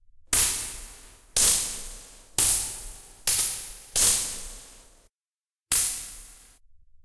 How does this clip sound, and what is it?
Sounds like a tazer, Or someone getting an electricshock!
Enjoy!